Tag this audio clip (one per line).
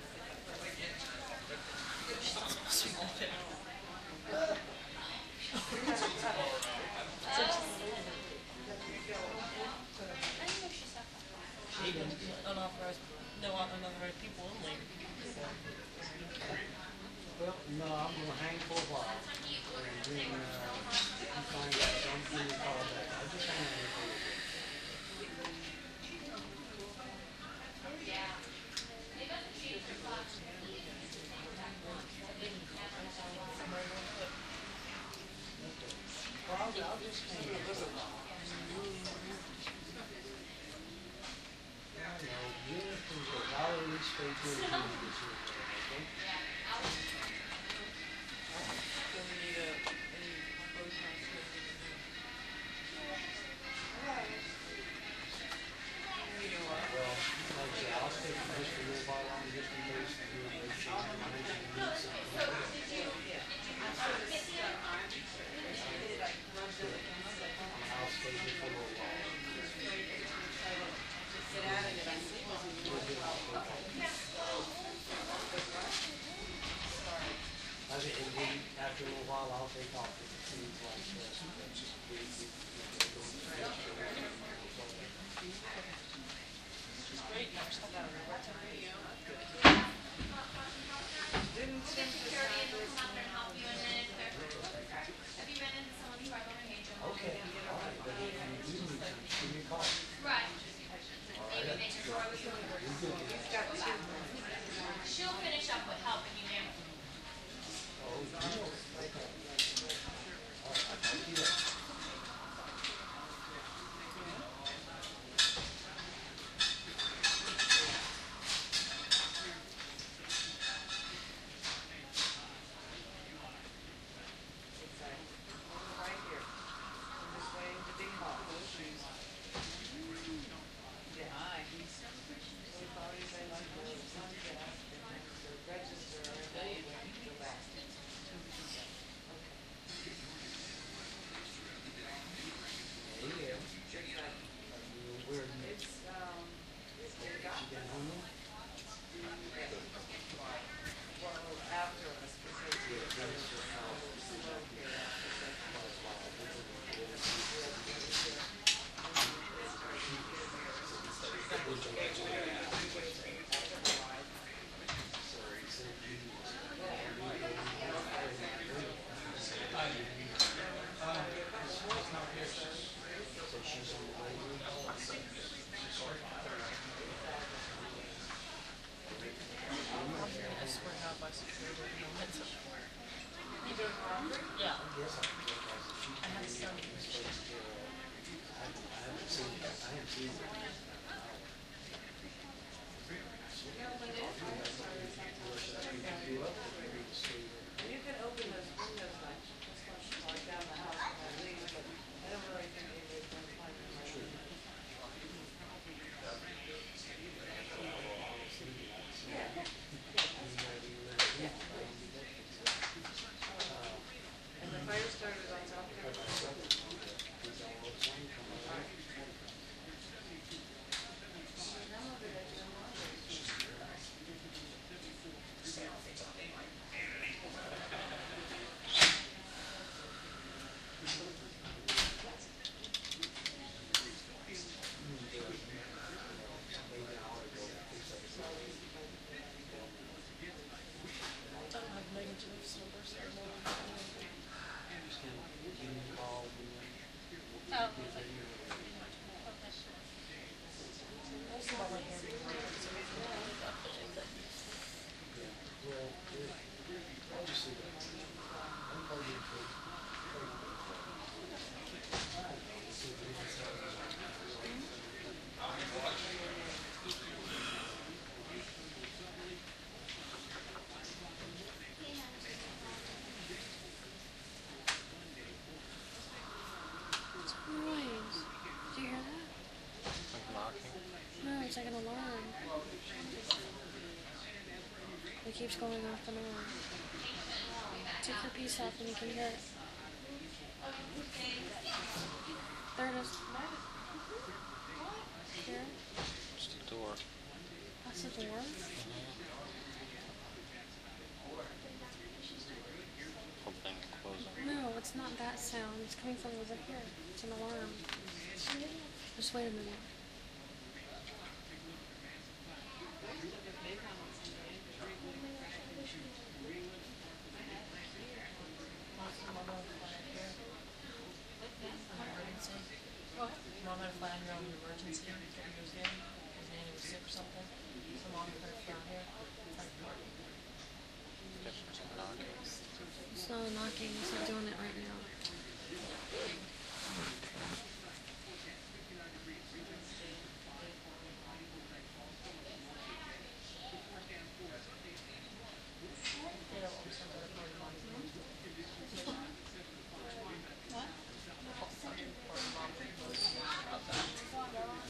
ambience
emergency
hospital
room